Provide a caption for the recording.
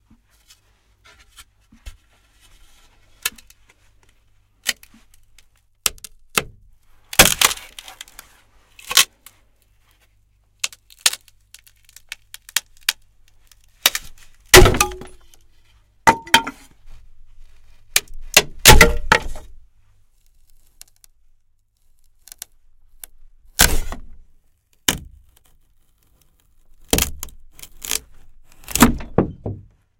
Tearing apart pieces of wood. Recorded with AT 2035.